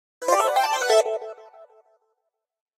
explosion beep kick game gamesound click levelUp adventure bleep sfx application startup clicks event